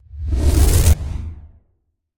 Charge Up
A rising flame sound made from layering the expulsion of gases from litre bottles of soda then applying wave-shaping, heavy compression, wet/dry filtering and layering. Intended as a "riser" - a sound that rises in tension to climax with another sound.
explosion, flame, heat, Rising